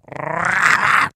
Cartoon - Chihuahua Snarl
A cartoon chihuahua-like snarl
animal, cartoon, chihuahua, coo, creature, curr, dog, gnarl, goblin, gremlin, growl, monster, snarl